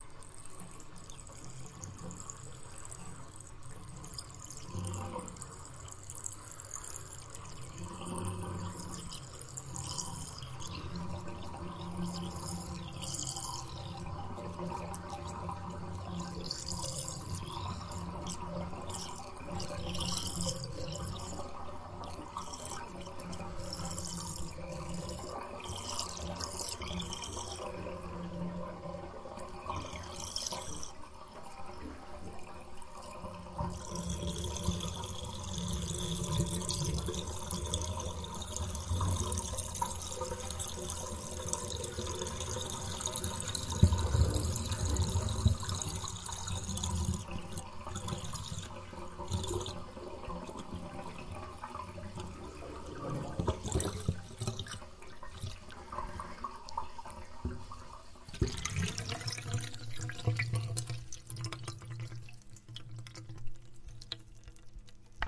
bath tub water drainage
Recorded with tascam dr-07mk2 (X/Y mics) very close to the source
Draining water through the sink in my bath tub.
gurgle, water, drain, drainage, bath